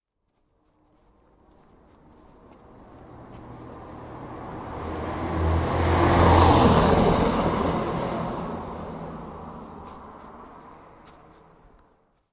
Vehicle passing - driving car - lorry - van - bus - tractor - truck
Vehicle passing
Recorded and processed in Audacity
bus, car, cars, city, drive, driving, engine, field-recording, lorry, motor, passing, road, street, tractor, traffic, truck, van, vehicle